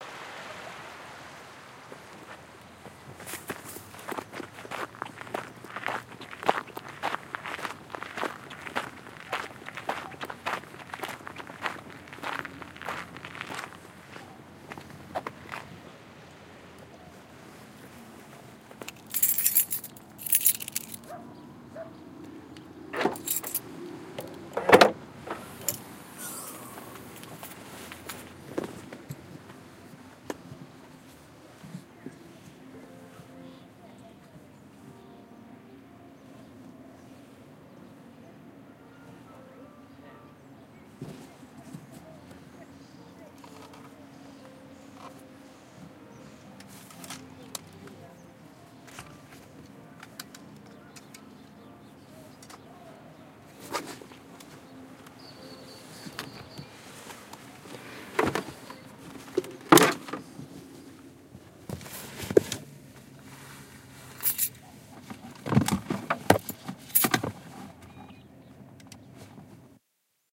Walking along a gravel path on the sea-shore to my car and packing up, there was a house near by, as I turned the mic sideways to fit in the back of the car I could suddenly hear someone inside the house playing the piano, just audible, I paused and listened, then put the gear in the boot while it was running.